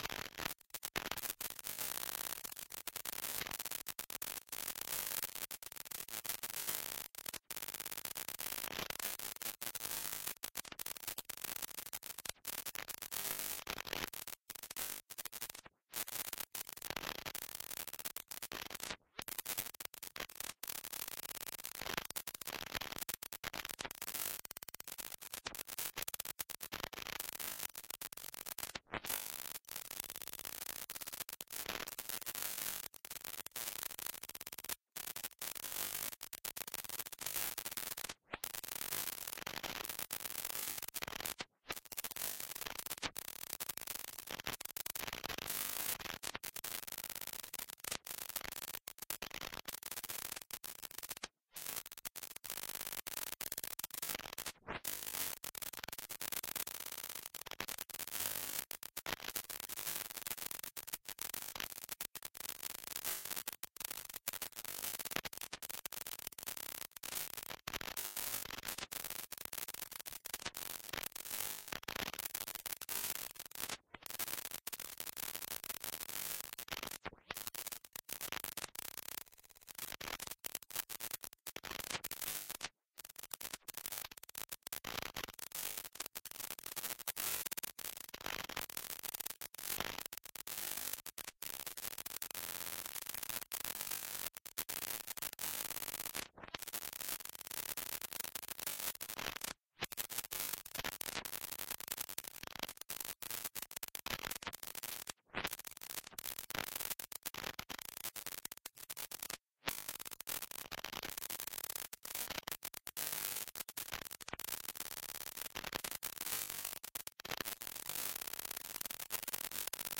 Ambient noise created with the Melda Noise Genertor VST plugin, the Melda Auto Pitch VST plugin and the Illformed Glitch VST Plugin. Loopable and suitable for background treatments.
Ambient, Glitch, Illformed, Melda, Noise, VST